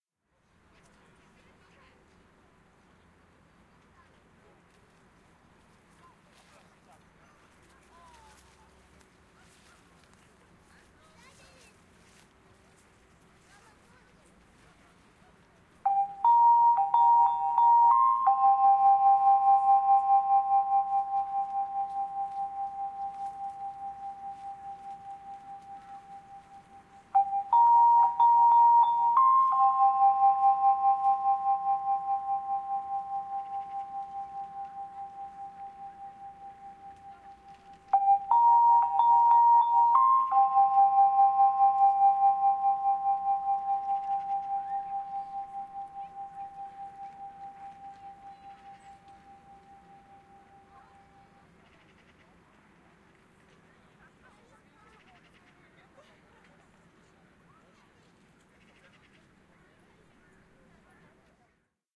PL: Nagranie zegara z Parku Bródnowskiego na Targówku w Warszawie.
ENG: Recording clock Bródno park Targowek in Warsaw.